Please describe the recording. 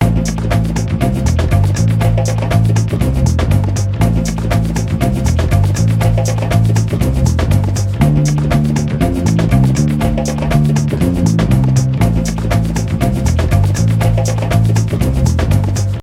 Checking the files and looking for evidence while they don't come back!
Little song loop made with Garage Band.
Use it everywhere, no credits or anything boring like that needed!
I would just love to know if you used it somewhere in the comments!
music, police, chase